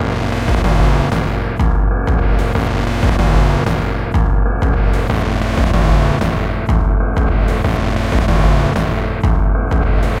A drum loop morphed with ni massive